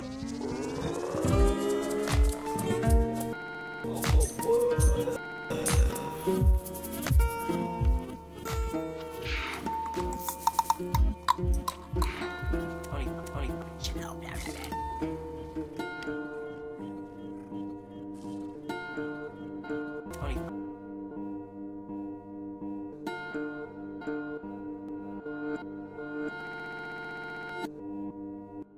The end of one of my original songs called "I Still Dream". Plenty of warping and pitch shifting can be heard.